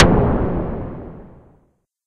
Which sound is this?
This sound was created using Frequency Modulation techniques in Thor (a synth in the Reason DAW).